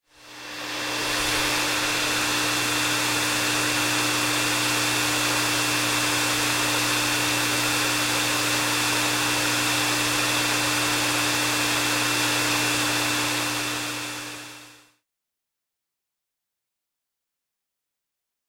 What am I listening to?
Hair dryer
Zoom H4n Pro
2018
hairdryer, appliances